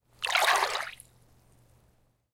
Raw audio of swirling water with my hands in a swimming pool. The recorder was placed about 15cm away from the swirls.
An example of how you might credit is by putting this in the description/credits:
The sound was recorded using a "H1 Zoom recorder" on 1st August 2017.